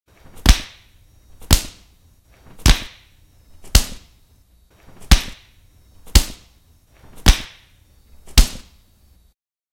A series of similar sounding huge hits with transients preserved.